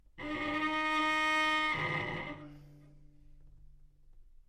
Part of the Good-sounds dataset of monophonic instrumental sounds.
instrument::cello
note::D#
octave::4
midi note::51
good-sounds-id::4564
Intentionally played as an example of bad-richness